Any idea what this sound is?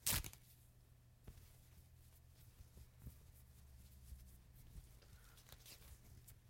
A pack of Rips and Tears recorded with a Beyer MCE 86N(C)S.
I have used these for ripping flesh sounds.
Enjoy!
flesh, rip